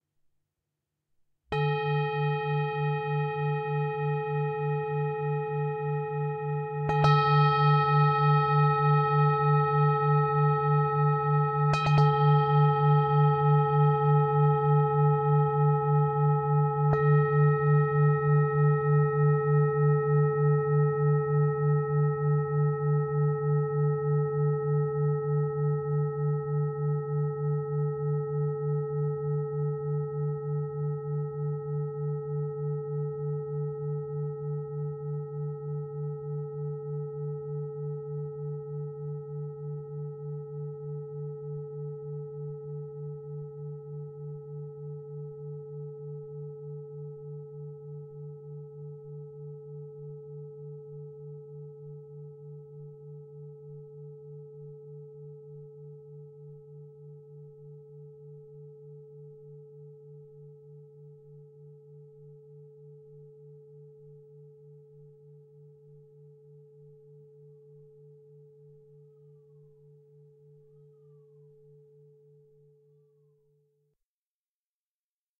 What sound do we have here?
Himalayan Singing Bowl #30
Sound sample of antique singing bowl from Nepal in my collection, played and recorded by myself. Processing done in Audacity; mic is Zoom H4N.
Lots of short taps in this sample and no droning.
ring, meditation, singing-bowl, ding, strike, tibetan-bowl, harmonic, clang, drone, gong, tibetan, percussion, metal, chime, hit, ting, metallic, brass, bronze, bowl, bell